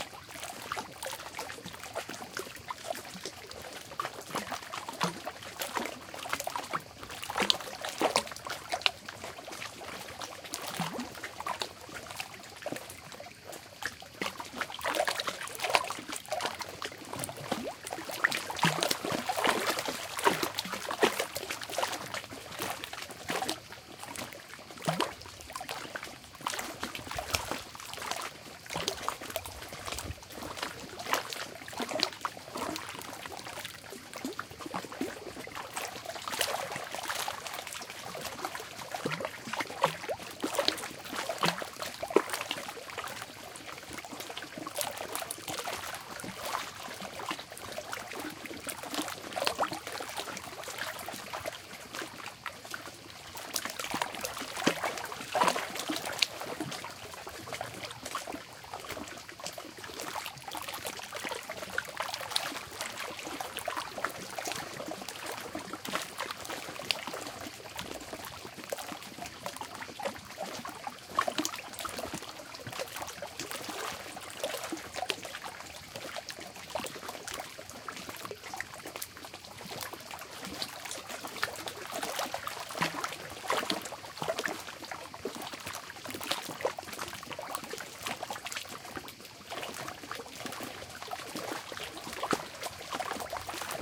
Calm Mediterranean Sea
Just some small waves hitting the rocky shore of the calm summer sea. Some very distant crickets in the background. Recorded in the National park of Mljet, Croatia
nature, summer